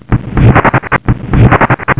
Loop-Glitch#06
bent, break, fast, glitch, glitchcore, loop